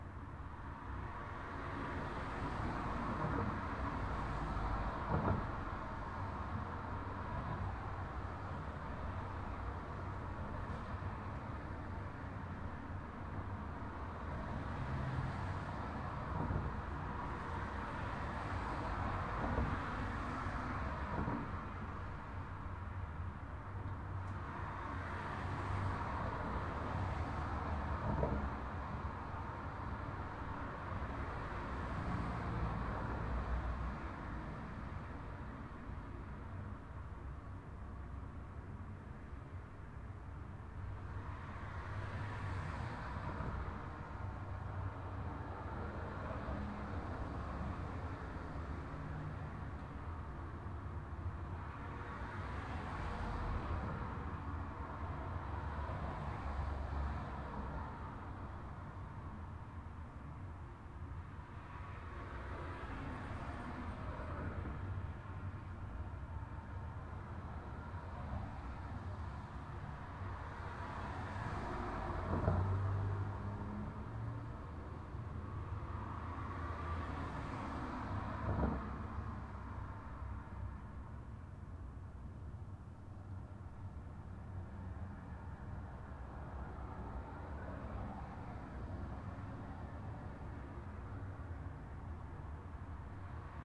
AMB Int RoomTone Traffic 002
This is traffic outside my office on Ventura Boulevard, recorded in the lobby with the front door closed. The traffic is still fairly present, because there's a mail slot in the door which allows quite a bit of the sound through.
Recorded with: Sanken CS-1e, Fostex FR2Le
ambience, car-by, cars, city, room-tone, roomtone, street, traffic, wash